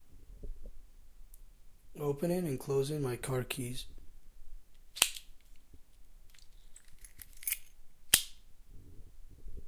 Opening and closing car keys.
care, close, keys, open